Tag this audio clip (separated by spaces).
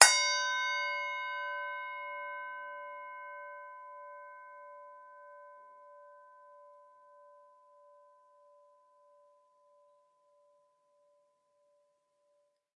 ohm overtone yoga